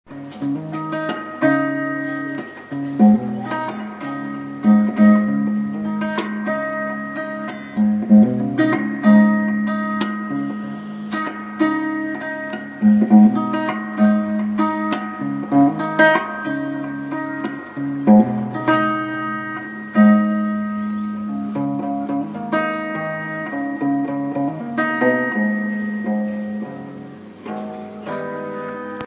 String ringz
Uneek guitar experiments created by Andrew Thackray
Guitar, instrumental, strings